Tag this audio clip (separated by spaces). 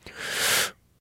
breathing human